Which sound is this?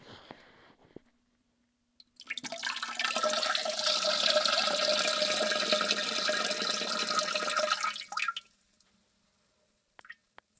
The sound of someone peeing into a toilet bowl
bathroom, pee, peeing, toilet, urination, urine, washroom